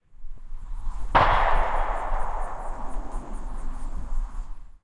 Edited audio of a distant gun being fired and echoing throughout the surrounding woodlands of Florida. I have reduced some of the noise.
An example of how you might credit is by putting this in the description/credits:
The sound was recorded using a "H1 Zoom recorder" on 5th August 2017.